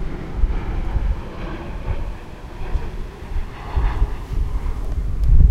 The sound of an airplane. Delta of Llobregat. Recorded with a Zoom H1 recorder.
el-prat, Llobregat